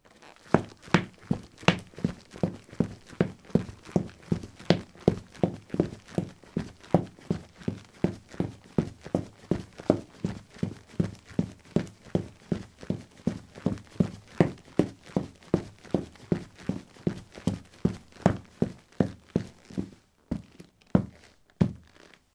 concrete 18c trekkingboots run
Running on concrete: trekking boots. Recorded with a ZOOM H2 in a basement of a house, normalized with Audacity.
steps, footstep, step, footsteps